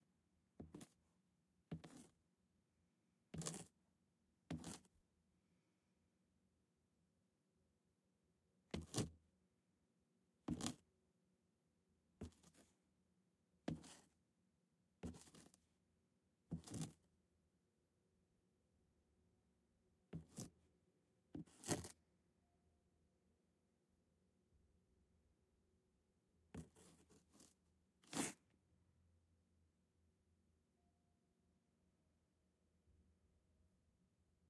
DeLisa Foley gritty footsteps 01
Leather shoe Foleyed by DeLisa M. White with a brick. Oktava stereo mics.
gritty, shoe, Footsteps, brick, leather, Foley